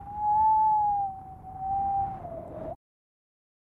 Winter is coming and so i created some cold winterbreeze sounds. It's getting cold in here!